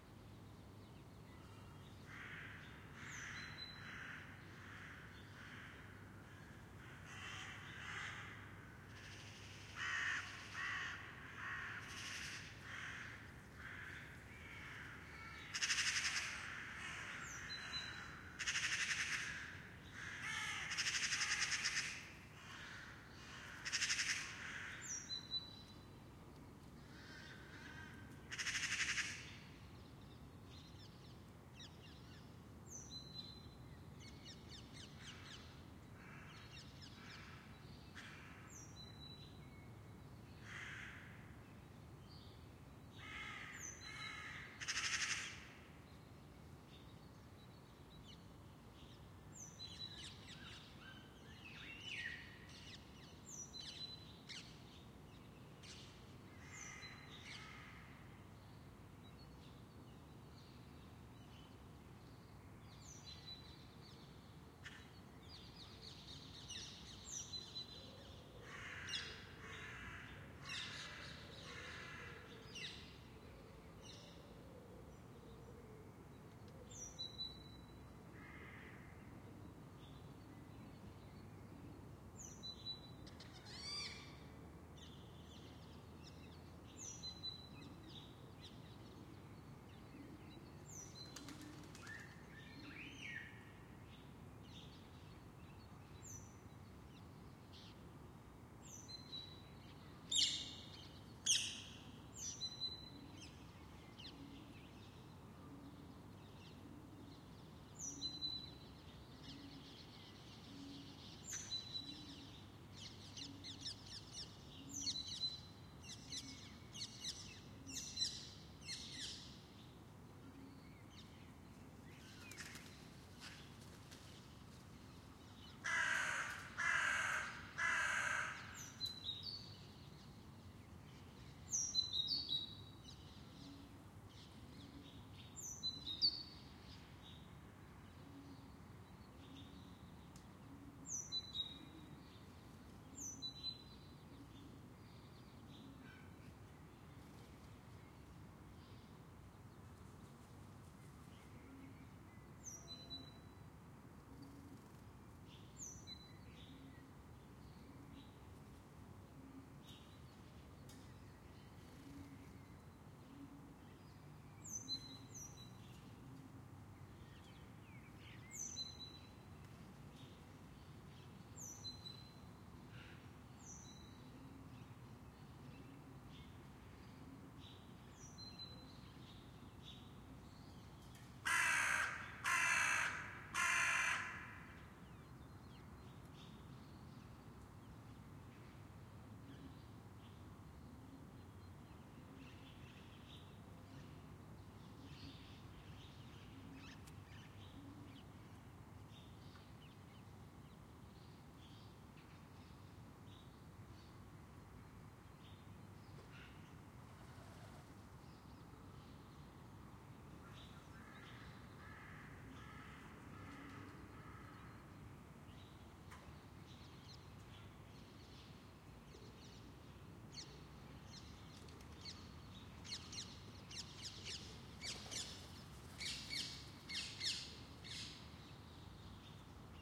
early city birds
early morning birds on a quiet spring day in Amsterdam. Recorded using 2 spaced omni taped to a window and facing a row of back gardens.
EM172 (as PZM)-> studio konnekt 48.
Amsterdam, early-morning, spring